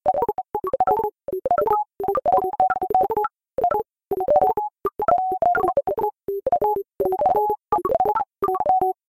Little Robot Sound
Little robot voice that sounds a lot like Telly Vision (from Chibi-Robo). It's a no-words-voice, so you can use it in videogames or in a kawaii world or wherever you want!
chibi-robo, arcade, soundeffect, artifical, videogame, robot, computer, robotic, video-game, speech, game, littlerobot, sci-fi, voice, kawaii, lo-fi